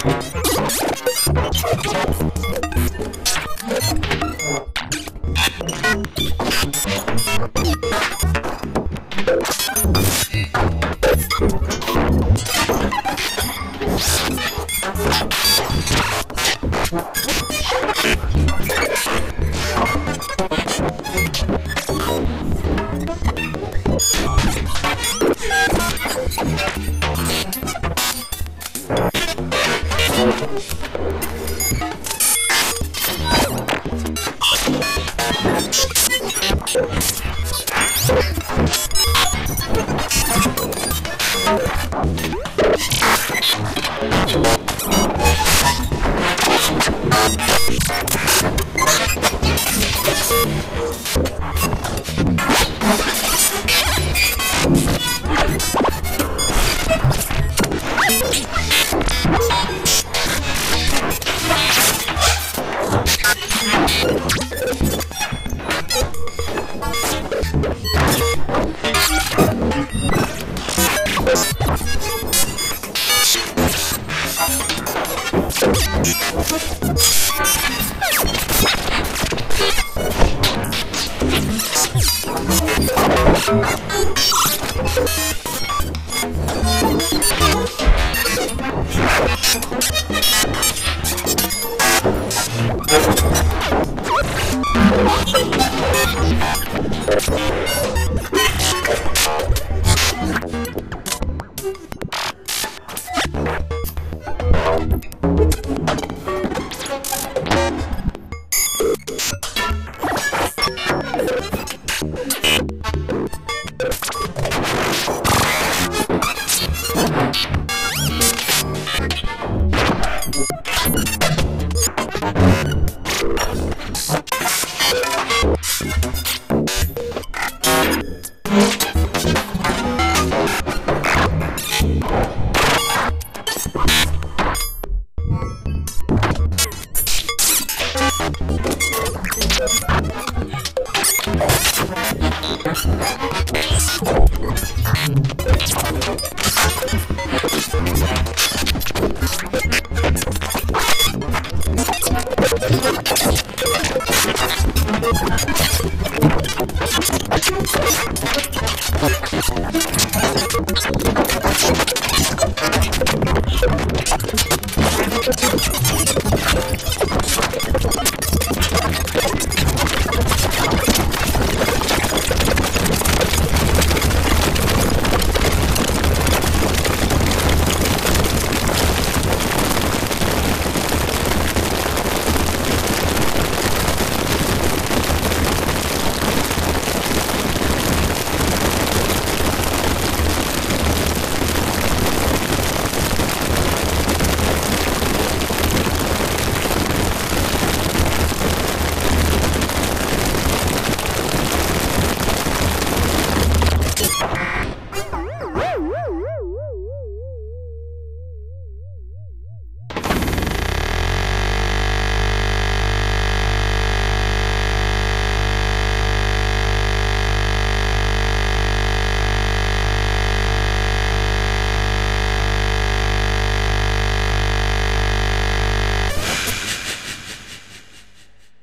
taken fast
noise, harsh, synth, synthesizer, modular